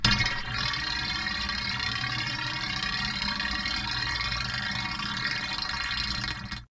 the same as before but deepened in pitch without a time correction
deep, gurgling, metal, oscillating, shimmying, spinning, whirring